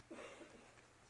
Cough Faint 1
Recorded with a black Sony IC voice recorder.
cough, distant, faint, human, ill, sickness, snuffle, sound, voice